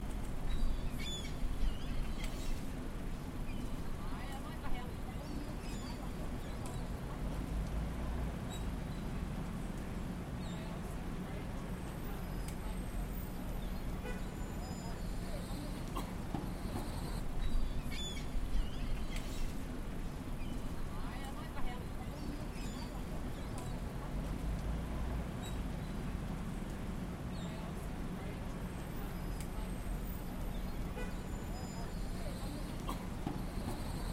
Chinatown with Seagulls (RT)
Street in Chinatown, New York City with seagulls
ambient, chinatown, city, effect, field-recording, new-york, seagulls, sound, tone, traffic